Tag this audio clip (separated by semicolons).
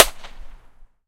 Concrete; Masmo; Outside